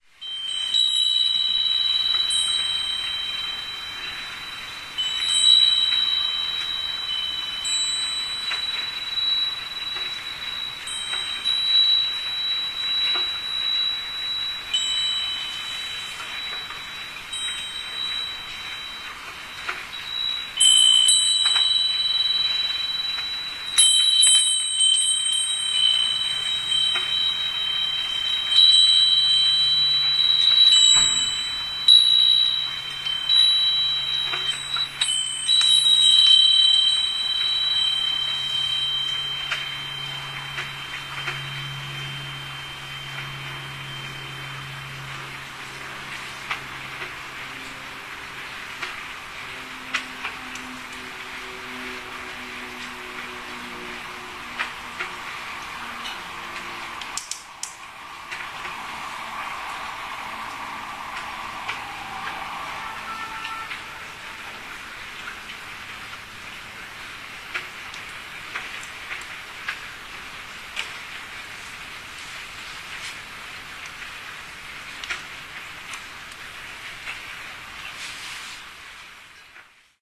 13.11.2010: about 15.00. my office at my place. tenement on Gorna Wilda street in Poznan. sound of window bells in the end audible are plane and ambulance sounds.